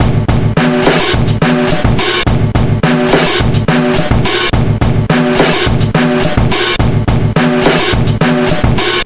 vst slicex combination (step by step) and fl studio sequencer
beat, beats, breakbeat, breakbeats, drum, drumloop, drumloops, drums, loop, loops, snare